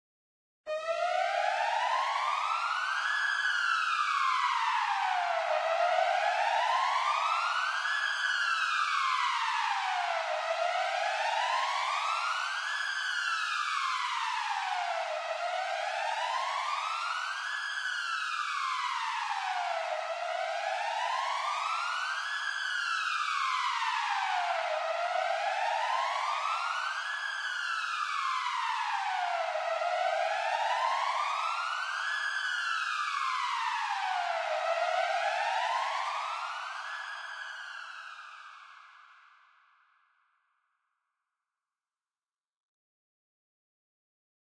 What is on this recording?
Panning Alarm/Siren
This is not a recorded sound. Created on pro tools by me
Alarm, Alert, Emergency, Panning, Siren, War